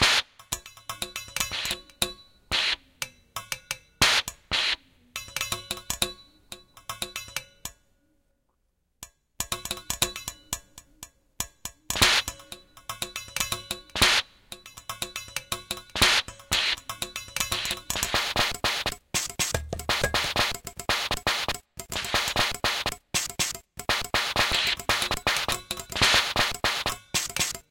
BZH Trumpet Buildup02
Break pattern created from sampled and processed extended trumpet techniques. Blowing, valve noise, tapping etc. Was cut up and edited using Max/MSP and Reaktor. Materials from a larger work called "Break Zero Hue"